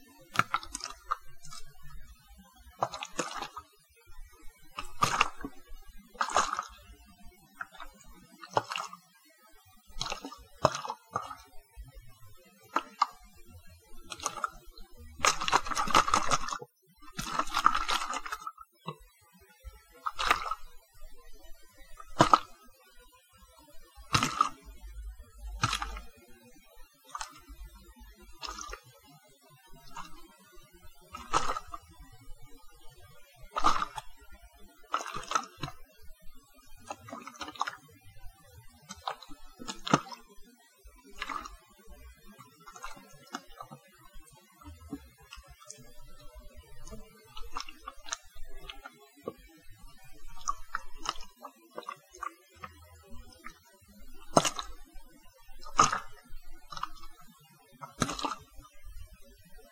splashes splats
playing with a bottle of bio oil for watery sounds